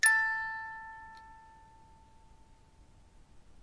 one-shot music box tone, recorded by ZOOM H2, separated and normalized